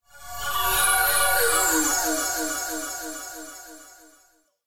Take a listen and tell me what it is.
having a lot of fun with carla rack whysynth amsynth and zynadsubfx stacking and randomizing them